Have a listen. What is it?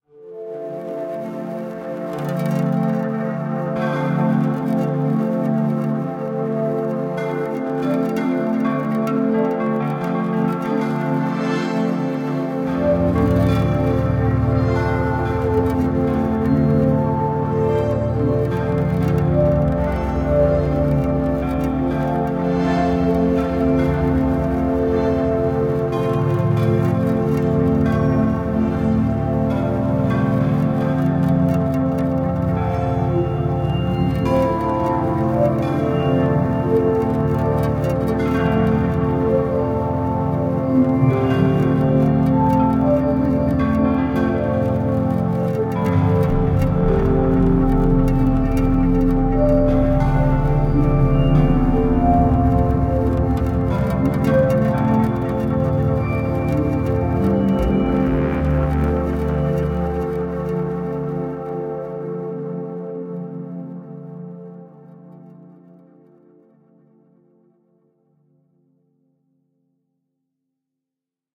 short mystical music made up for a website. enjoy